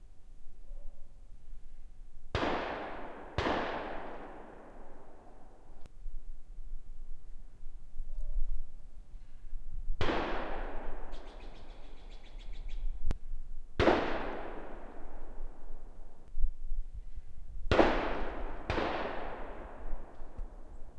Shots in the woods
Recorded while hiking Ten Mile Creek in Hume, CA,on September 4th 2014 you can barely hear the man yell "pull" before the gunshots from far away. This sound was recorded on a hand held digital recorder and has not been edited at all.
gun, shoot, shot, shotgun, shots, weapon